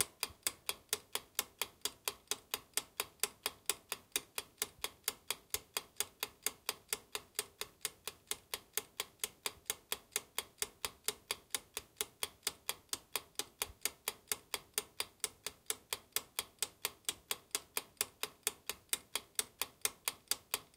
Ticking timer
Timer from my oven, loopable sound.
clock, tac, tic, ticking, time, timer